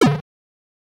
Block - [Rpg] 1
rpg, game-sfx, fx, efx, free, game, sound-effect, guard, sfx, shield, ct, prevent, block, sound-design